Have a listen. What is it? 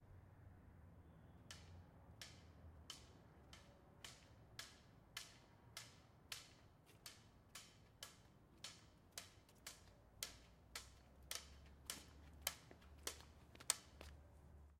Blind person with white cane walking towards mic. Recorded with Sound Device 702T (shotgun mic). Quiet street in the city.
cane, field-recording, walking